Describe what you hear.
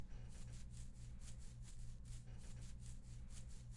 15-Rascandose la cabeza-glued
head, foley, scrath, audio